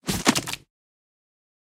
Sword hits the body
blood, body, fight, flesh, kill, punch, sword